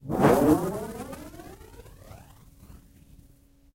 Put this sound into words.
peeling tape off of a masking tape roll (reverse/pitch manipulated)